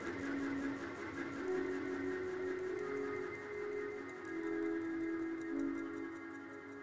Six notes played on a pad, for a distorted, granular effect.